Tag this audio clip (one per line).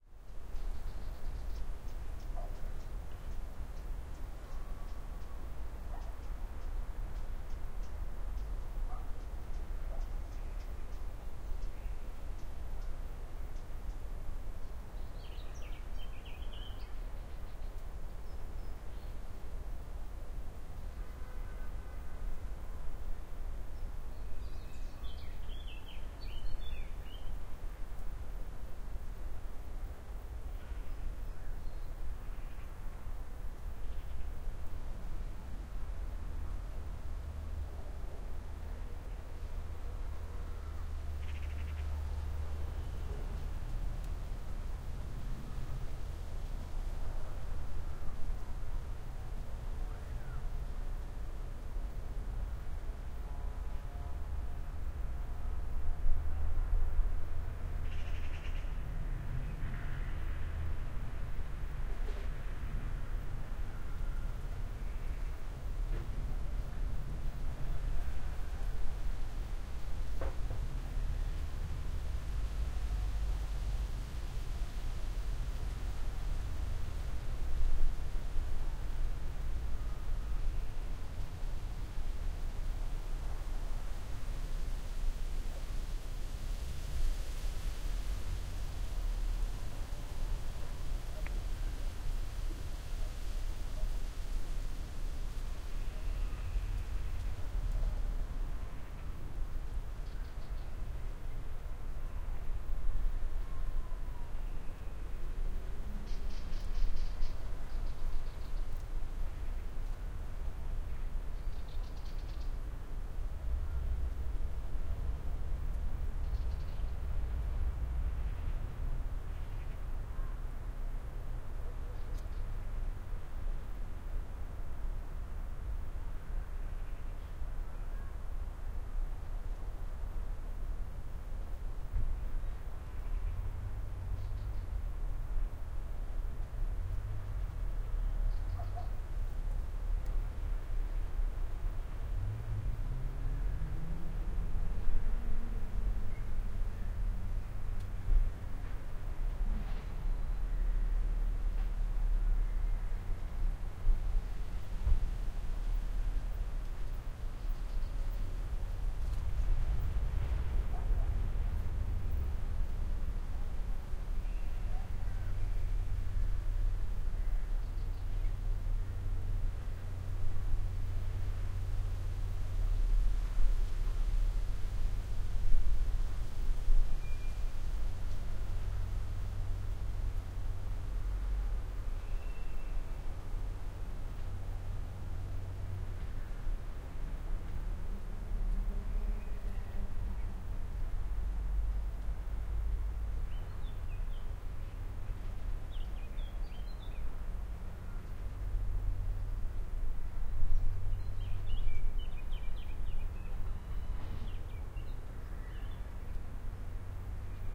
Field-recording
Nature
Birds